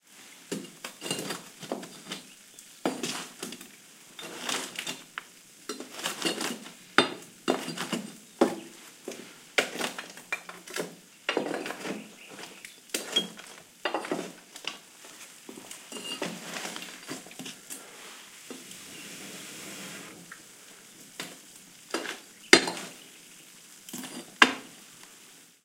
Various noises produced arranging a fireplace. Audiotechnica BP4025, Shure FP24 preamp, PCM-M10 recorder. Recorded near La Macera (Valencia de Alcantara, Caceres, Spain)